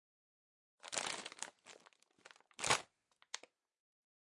Sound of shoping, Litle store ( crackles..)